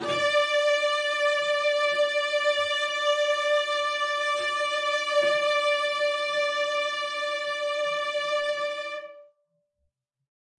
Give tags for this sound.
cello; cello-section; midi-note-74; midi-velocity-95; multisample; single-note; vibrato-sustain; vsco-2

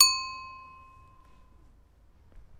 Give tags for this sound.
rider; pedaling; bike; bicycle; cycle; ride; street

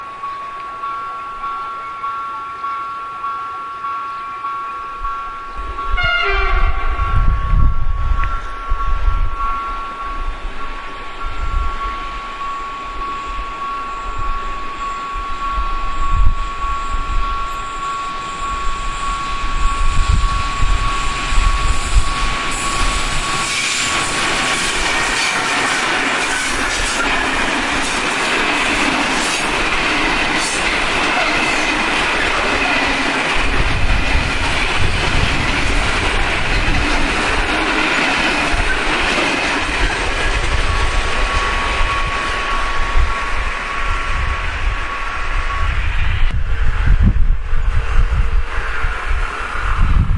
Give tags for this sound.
station; train; horn

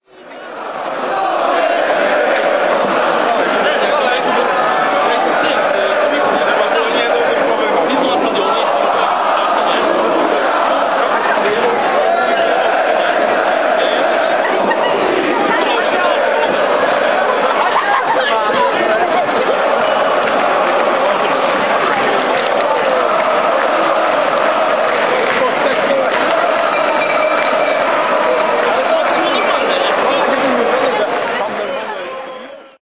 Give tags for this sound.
crowd; football-team

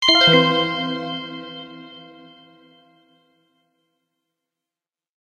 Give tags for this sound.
application; bleep; blip; bootup; click; clicks; desktop; effect; event; game; intro; intros; sfx; sound; startup

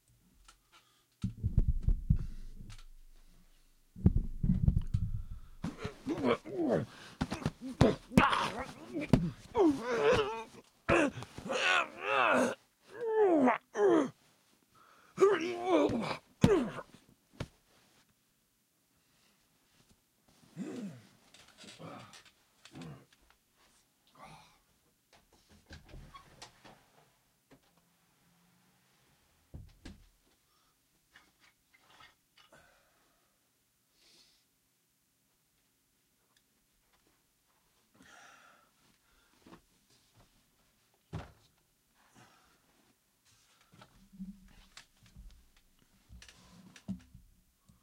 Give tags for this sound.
fighting; stage; FX; cinema; video; struggling; scuffle; sound-effects; wrestling; film; theatre

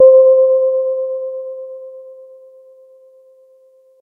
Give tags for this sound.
electric-piano; multisample; reaktor

